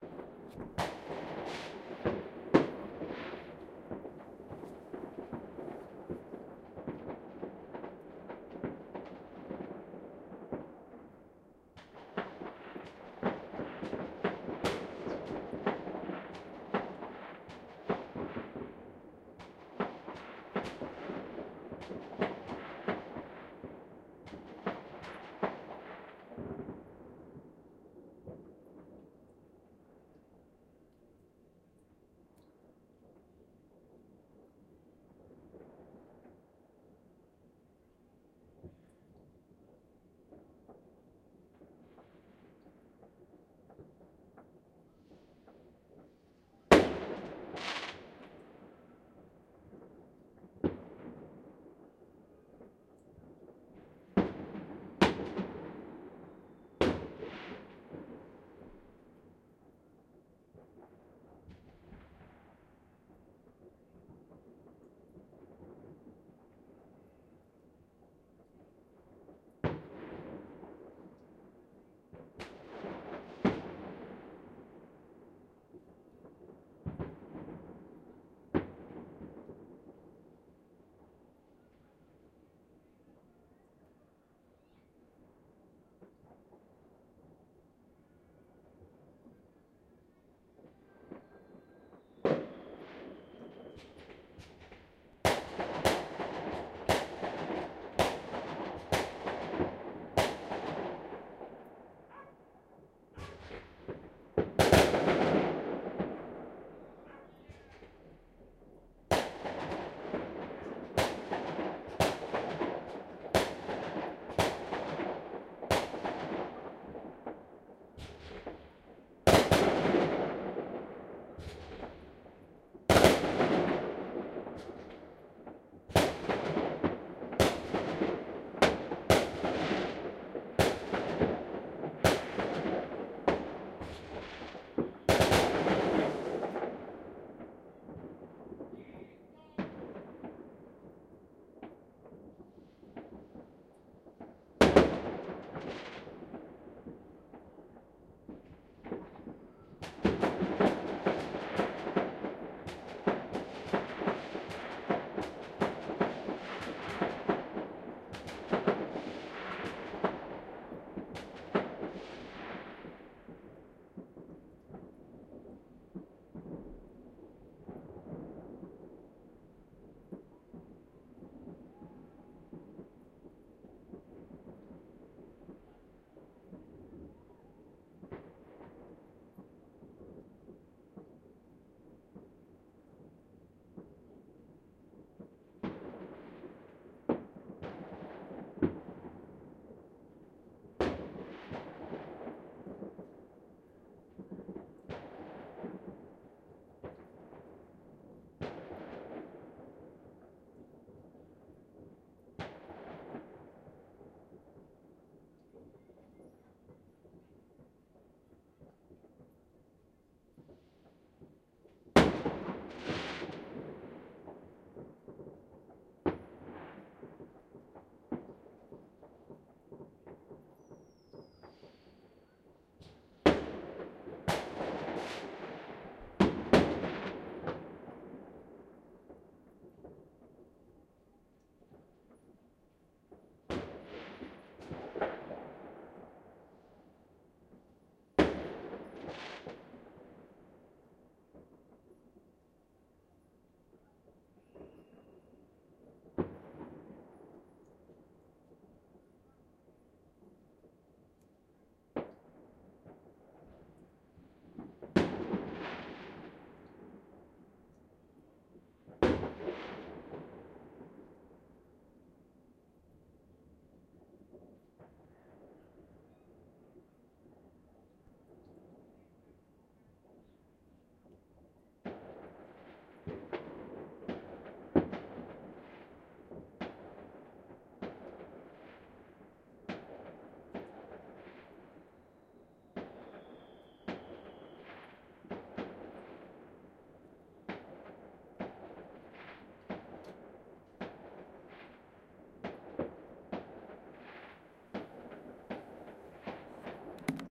New year celebrations recorded from distance